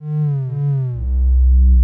130 super grumble bass 04
super sub grumbly bassline